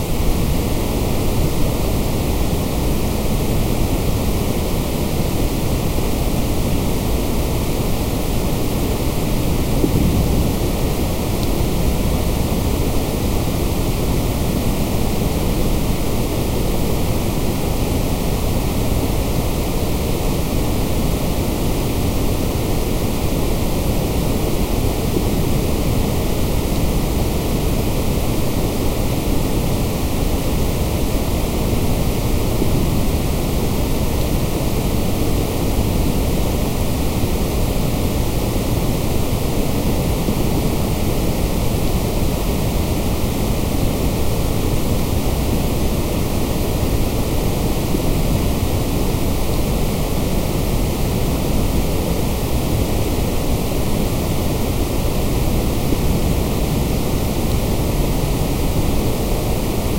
A mix of one of my rain field recordings and some white noise.